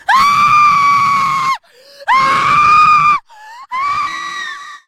Girl Screaming
Young woman screaming as loud as possible
agony, scary, Woman, yell